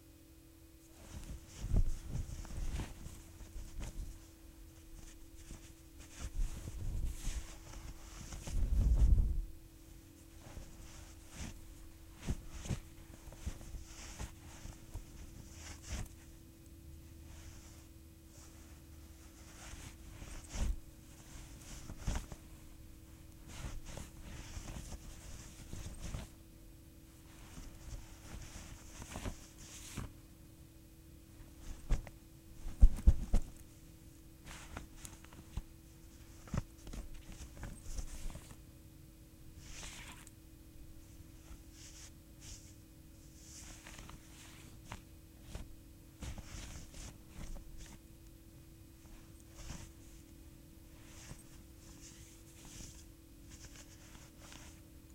foley: clothes moving
foley, motion, cloth, sweatshirt, clothes